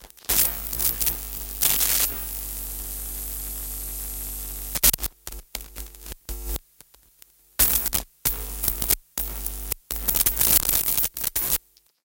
Signal interference. Alien communication.